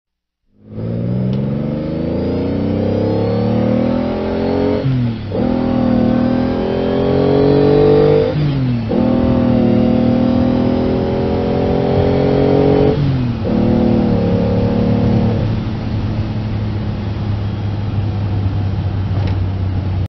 Car engine while driving